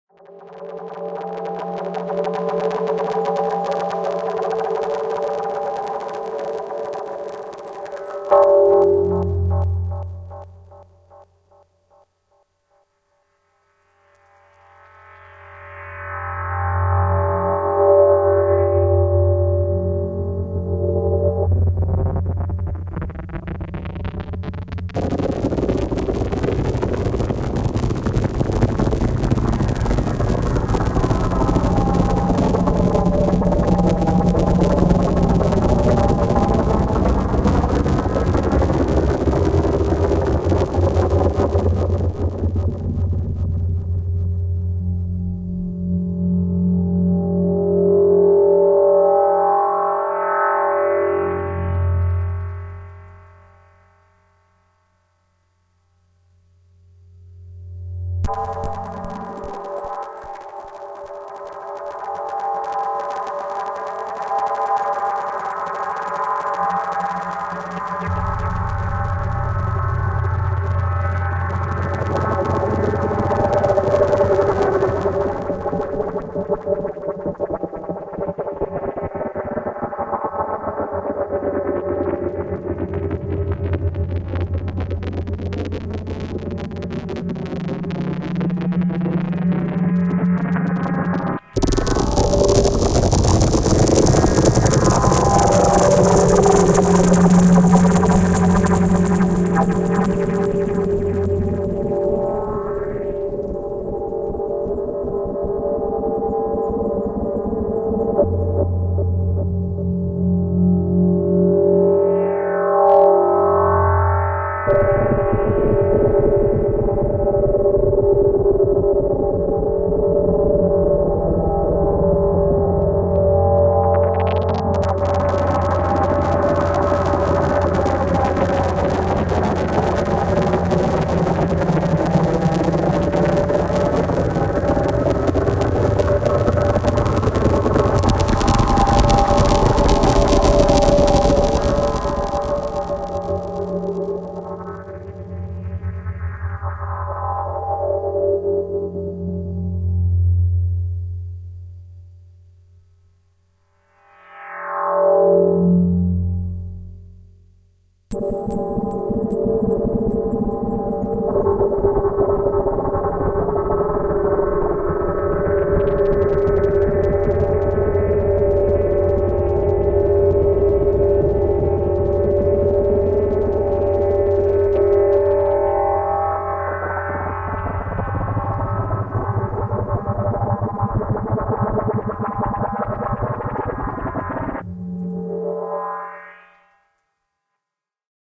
This is an impression of fire. It is created with the Clavia Nord Micro Modular and processed with a Boss SE-50. 'Fire' contains mainly in-harmonic
elements. For this purpose FM-synthesis is used, which represents it's
destructiveness. Is has elements of earth and wind, since both are
needed for 'Fire' to exist. The result is that 'Fire' flares up and fades away.
ambient, competition, elements, fire, impression, soundscape, synthesizer